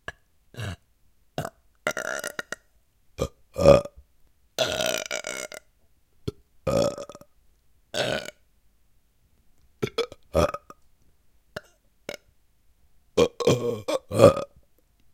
Me and my friend burping.
Recorded with Zoom H2. Edited with Audacity.
gas, belch, burps, gasses, female, male, burp, belching